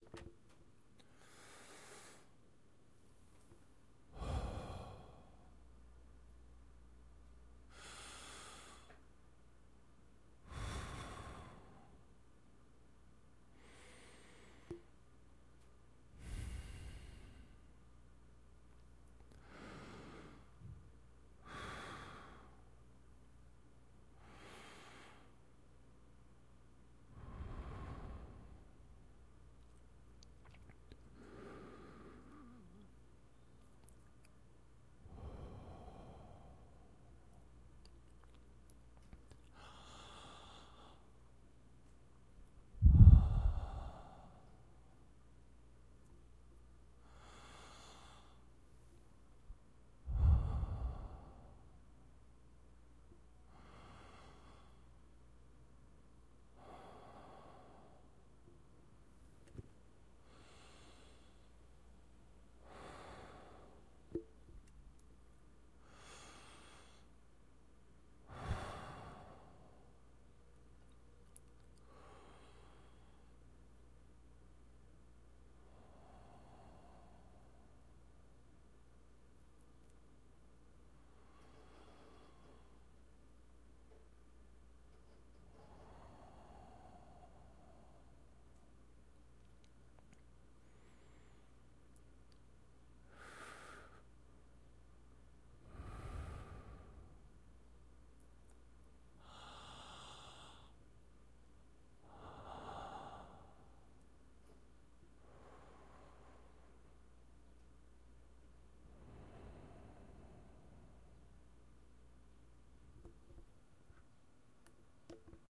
slow breath relax
male. slow deep breaths with different mouth shapes.